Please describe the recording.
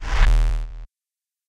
STM1 Uprising 7
Over processed deep bass. One hit quickly swells and dies (slides away).